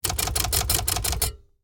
typewriter manual spacebar several times
Several sounds of the spacebar from a manual typewriter.
Recorded with the Fostex FR-2LE and the Rode NTG-3.
key, manual, mechanical, old, repeatedly, several, spacebar, times, typewriter